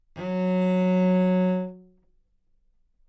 Part of the Good-sounds dataset of monophonic instrumental sounds.
instrument::cello
note::F#
octave::3
midi note::42
good-sounds-id::4316